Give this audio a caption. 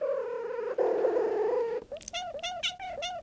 Our cat can make funny noises when she's happy. She meows and purrs at the same time it seems. I put all the sound snippets after one another.